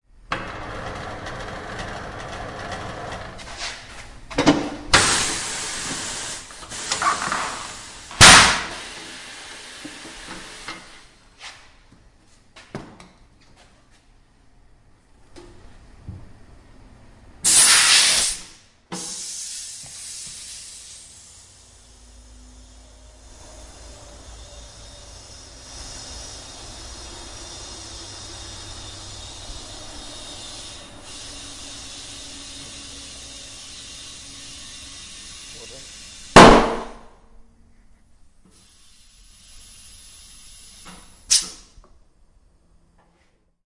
13092014 gronów retreading 003
Fieldrecording made during field pilot reseach (Moving modernization
project conducted in the Department of Ethnology and Cultural
Anthropology at Adam Mickiewicz University in Poznan by Agata Stanisz and Waldemar Kuligowski). Sound recorded in the retreading company in Gronów. Recordist: Adrianna Siebers. Editor: Agata Stanisz
poland, roadside, car, noise, road, machine, retreading, lubusz, gron, w, fieldrecording